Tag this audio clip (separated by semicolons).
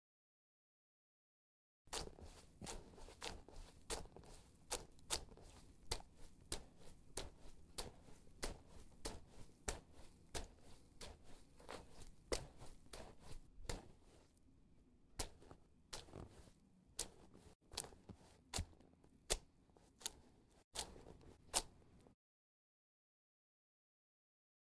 foley; mud; muddy